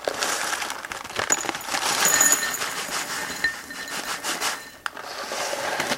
Pouring cereal into an empty glass bowl. Useful for when you need the sound of cereal pouring into an empty glass bowl. Recorded using a Sony IC Recorder, processed in FL Studio's Edison to remove noise.
bowl, cereal, empty, sony-ic-recorder, pouring